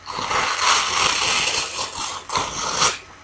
Another Unwrapping sound made with paper. Plus no Parrot sound.
Recorded with Audacity.
Paper, Present, Tearing